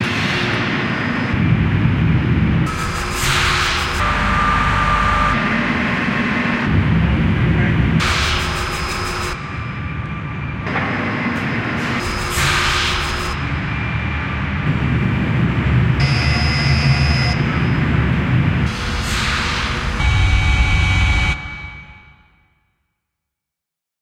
Danskanaal (Geluid)
A sequence of machine and ambiance noises of a chemical factory.
Gent, industry, industrial, factory, noise, machine, mechanical, rhythm, machinery